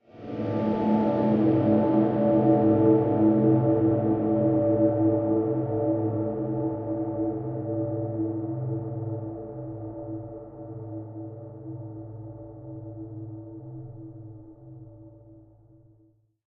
Bell bowed with grief
A bow treated bell sound that underwent several processing steps